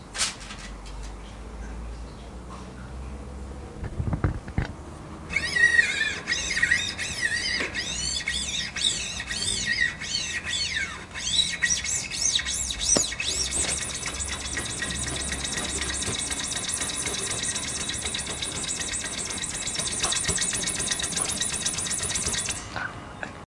Rubbing on guitar strings OWI
Recorded with rifle mic. Electric guitar strings. 5 strings
guitar, strings, OWI, Rubbing